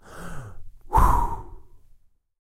Man blowing out a candle.